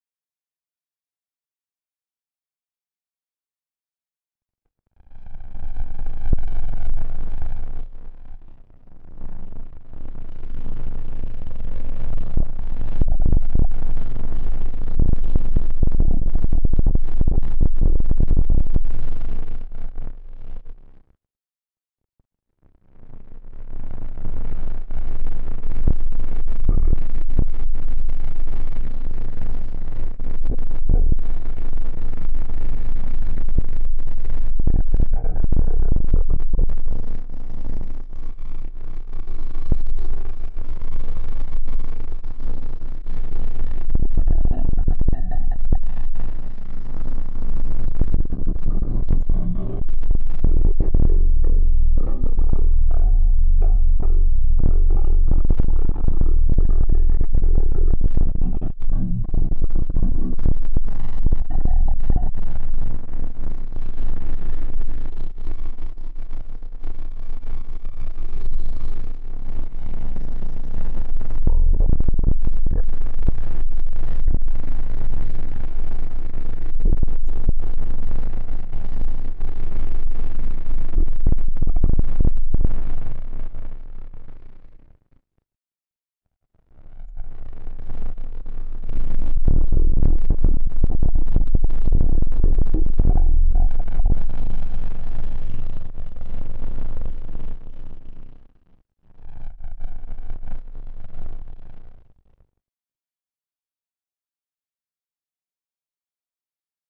10 chant bunker Bonus (09 MS-20FX)
Glitchy sound design, like speakers with bad contact. Ninth step of processing of the bunker singing sample in Ableton. Added Korg's MS_20FX.
glitch, sounddesign, saturation, sound-design, sfx, soundeffect, electric